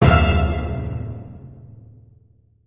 Jump scare sound
The sound I made for jumpscares scenes in game or movie. Using Musescore to create.
horror, jumpscare, scare